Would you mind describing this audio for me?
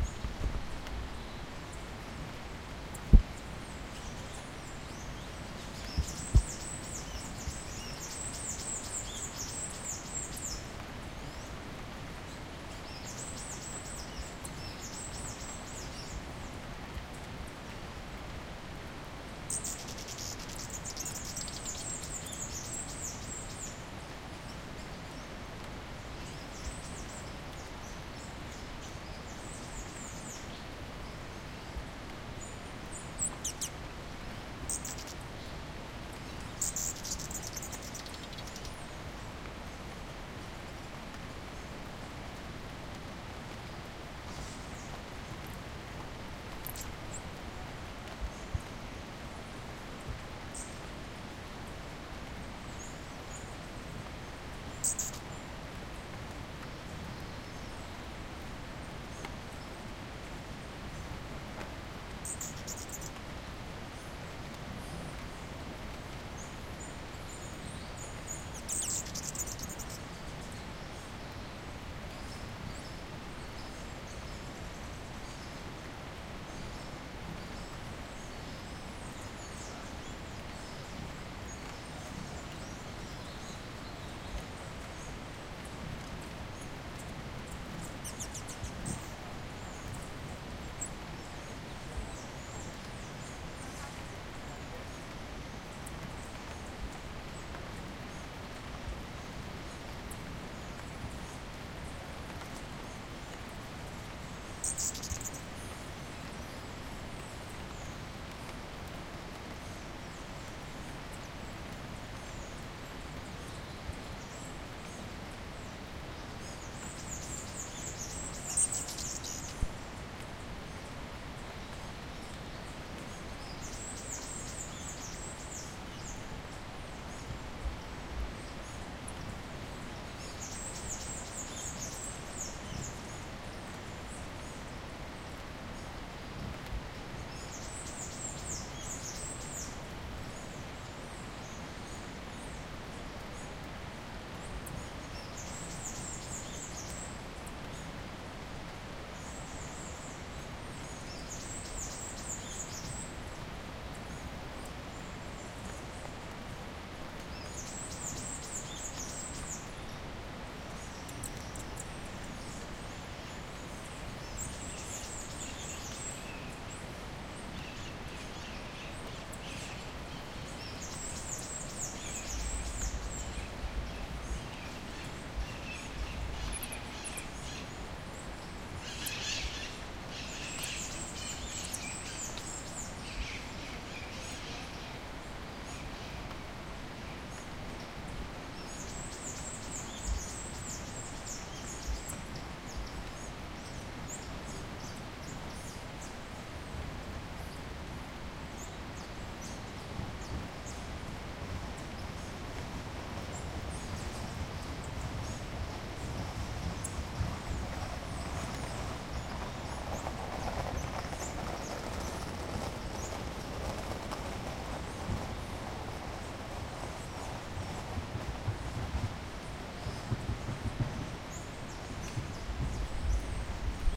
Hummingbird Peru STE2
Stereo recording of hummingbirds feeding and fighting in the forest of the Peruvian Andes near the Machu Picchu and quite close to a strong river.